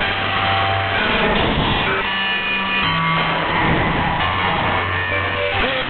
Fragment 002 Loop
Moving the frequency dial on the radio receiver. Some music and noise (mostly noise)
This sound works relatively well as a loop (for creastive purposes).
Recorded from the Twente University online radio receiver.
interference, music, noise, online-radio-receiver, radio, short-wave, shortwave, static, Twente-University